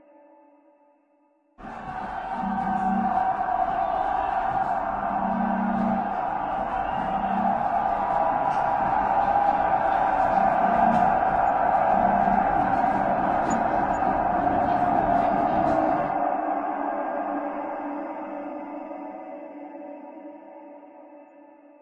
LAYERS 002 - Granular Hastings - C1
LAYERS 002 - Granular Hastings is an extensive multisample package containing 73 samples covering C0 till C6. The key name is included in the sample name. The sound of Granular Hastings is all in the name: an alien outer space soundscape mixed with granular hastings. It was created using Kontakt 3 within Cubase and a lot of convolution.